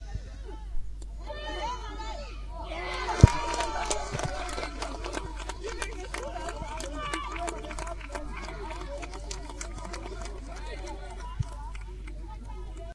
The first of four goals, recorded at a soccer / football game in Outrup, Denmark. Played by younglings from age 6 - 7.
This was recorded with a TSM PR1 portable digital recorder, with external stereo microphones. Edited in Audacity 1.3.5-beta on ubuntu 8.04.2 linux.